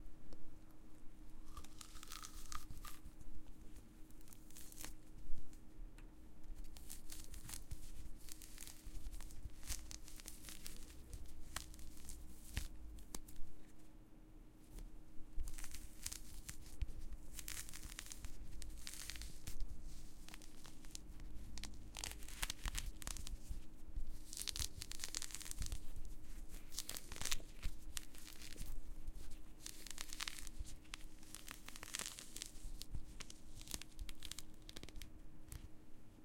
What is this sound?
Mandarin Peeling
Peeling of a mandarin orange in close detail.
Recorded in stereo with Rode Nt5s through a Tascam Us 16x08.
Close-Up, Crunch, Fruit, Mandarin, Natural, Orange, Organic, Peeling, Texture